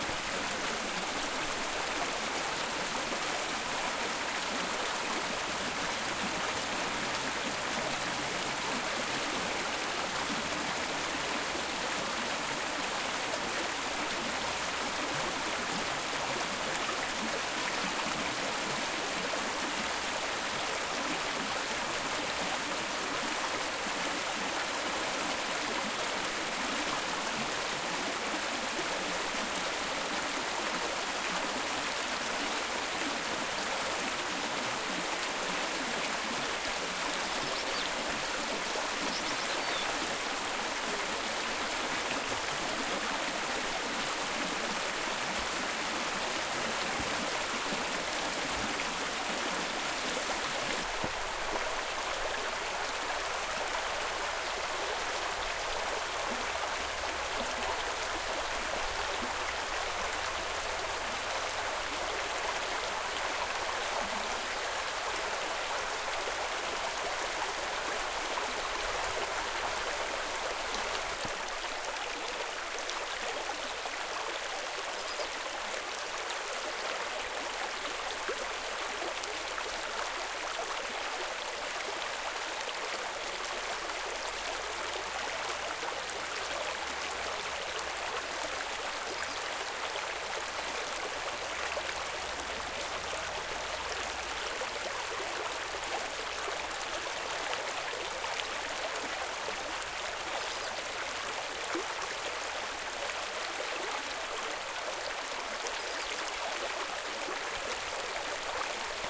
Murmuring, babbling, burbling and brawling brook in the Black Forest, Germany. I recorded the same brook at three different places and pasted the three samples together. Zoom H4n